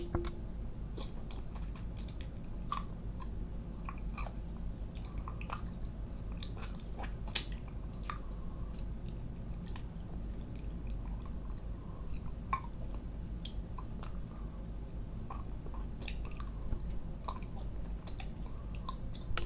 person eating peanut-butter and really smacking lips